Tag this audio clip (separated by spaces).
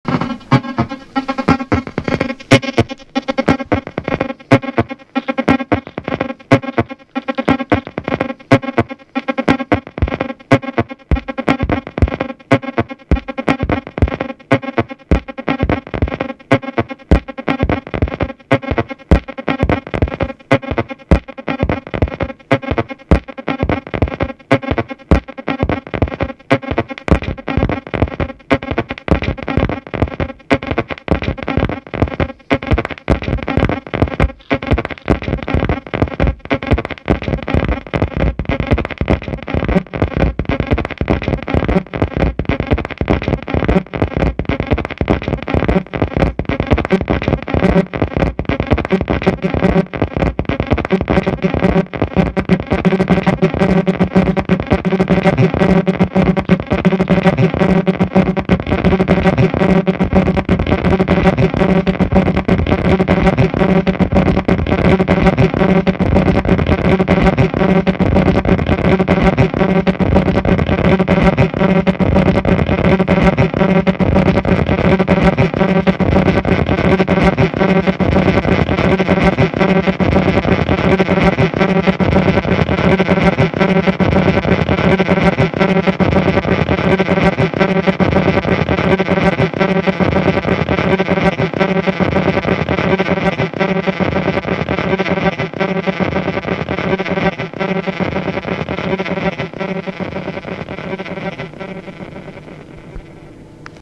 atari circuitbent lofi loop